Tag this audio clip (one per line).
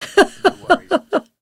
labs
story
female
jolly
laughter
laugh
book
voice
author
novel
joy
humor
girl
guffaw
josephson
close
voiceover
happiness
giggle
woman
funny
c720
empirical
mic
humour
microphone
over
mirth
npng